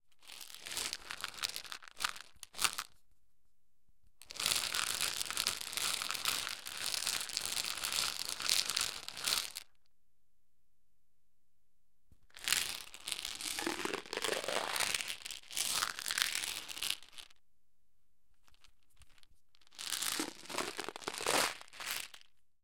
jelly beans 01
Rolling jelly beans around in a plastic container.